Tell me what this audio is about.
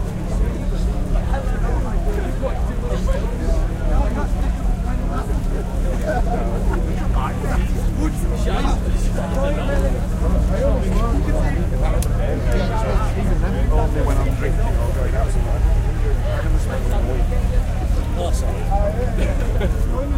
Festival Crowd 04
Festival Crowd LOOP 2/2. Recorded at the O2 Wireless Festival in Leeds
2006. Recorded with the Microtrack 2496 recorder.